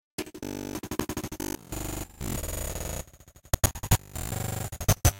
Drumloops and Noise Candy. For the Nose